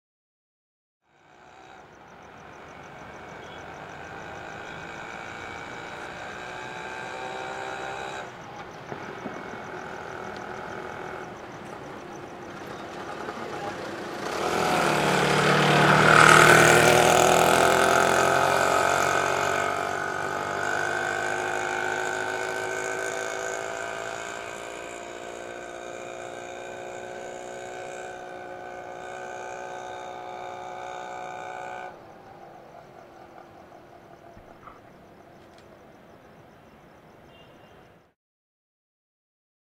Auto Rickshaw - Pass By
Bajaj Auto Rickshaw, Recorded on Tascam DR-100mk2, recorded by FVC students as a part of NID Sound Design workshop.
Richshaw, Rick, Auto, Autorickshaw, Ric, Tuk, India